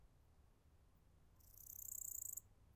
close cicada recording